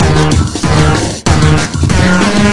Loops and Such made from the Stickman DiSSorted Kit, taken into battery and arranged..... or. deranged?